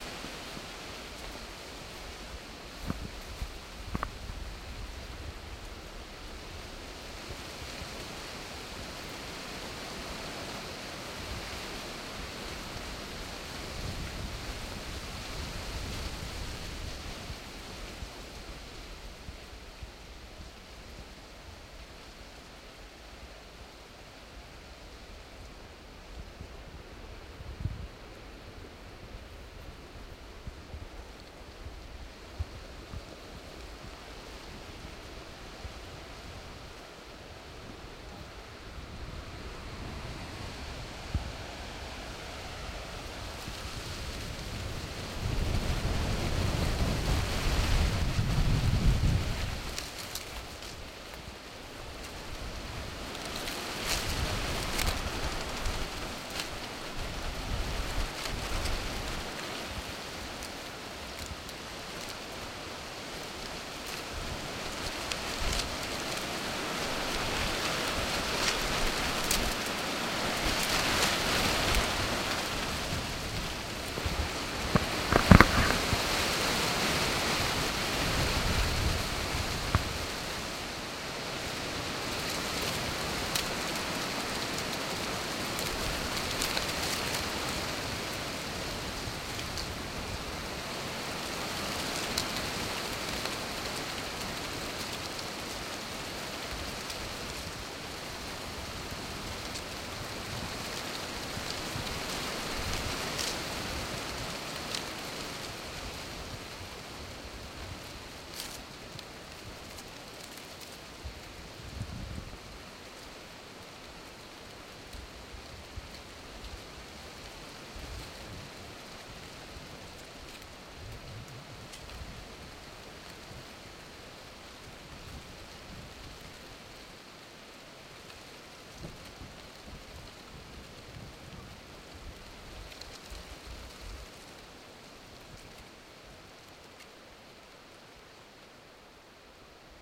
Trees Blowing in a Steady Wind
Wind blowing through trees and leaves in a steady breeze. Rustling of leaves and branches.
grass, field-recording, rustling, wind, nature, leaves, windy, forest, blowing, trees